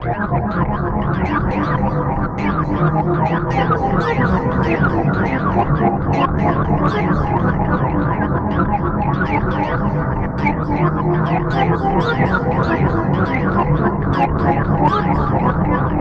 sick frogs
movie, frogs, madness, cinema, tool, sound, tension, score, sf, space, evil, horror